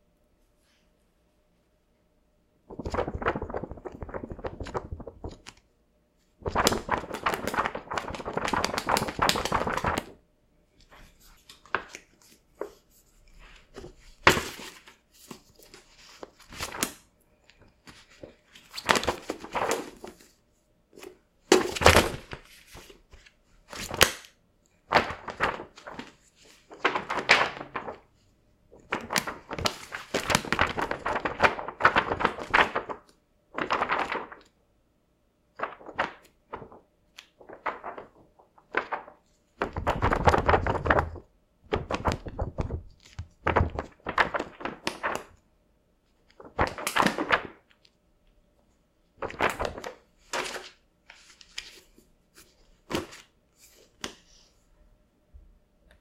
Waving around and throwing a book at different speeds. The book is a 350 page paperback called "In The Land of Invented Languages" by Arika Okrent, in case you were wondering. Recorded on my Tonor TC30 USB condenser microphone.
air, book, book-throwing, flip, flipping-pages, page, paper, paperback, sheets, throw, throwing-paper, turn, turning, waving-paper